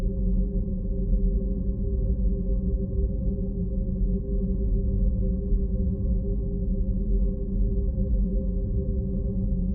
An ambient drone made and perfectly looped in Audacity. I think it would work well in a horror or sci-fi setting. It sounds like it was made with a synthesizer, but I actually made it from a recording of an old rotary telephone (The bit that spins).
Recorded with a Samsung Galaxy J2 Pro phone, edited in Audacity.
Edit:
Re-uploaded due to a slight clipping in the right ear when looped.
Edit 2: